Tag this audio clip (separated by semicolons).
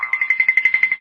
Funny; Walk